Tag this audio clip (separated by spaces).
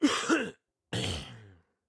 Cough Hack Sickness